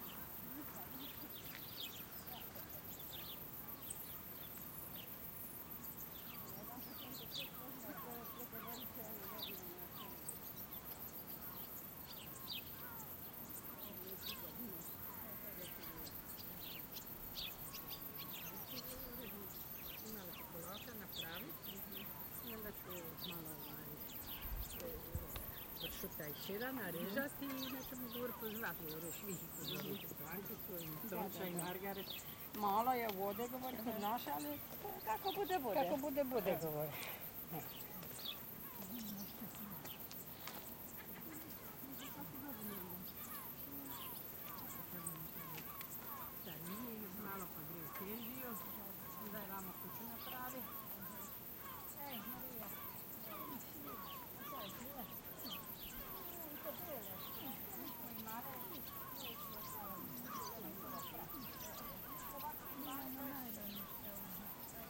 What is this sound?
Pag Starigrad sheep crickets birds seagull ppl
the soundscape from Old town called Starigrad near town Pag
after sonic voices from seagull, sheeps and constant crickets local old ladies talking to each other with local dialect.
sheep, crickets, birds, seagull, people